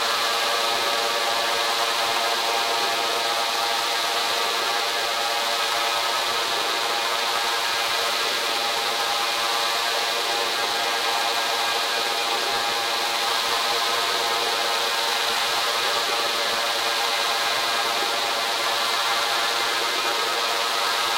Steady State Fate Quantum Rainbow 2, Quanta, thru Intellijel Rainmaker